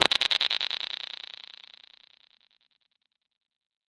microphone + VST plugins
sound, effect, fx, sfx